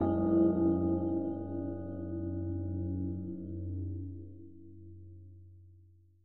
zen gong
a selection of sounds i made using various softsynths and vst plugins effects.
ambient, atmosphere, atmospheric, chilled, china, drone, meditate, meditation, mellow, road, scape, silk, softsynth, space, tibet